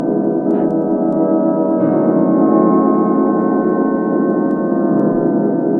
moody slide
A recording of a Jam Man loop of slide guitar, re-looped with a k2000
loop, chord, warm, slide, guitar, lofi, lo-fi